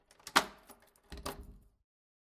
Keys locking outdoor and then get pulled out